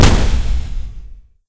2nd cannon-like boom. Made in Audacity.